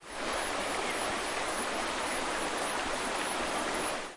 Son d’un grillon et d’une rivière. Son enregistré avec un ZOOM H4N Pro et une bonnette Rycote Mini Wind Screen.
Sound of a cricket and a river. Sound recorded with a ZOOM H4N Pro and a Rycote Mini Wind Screen.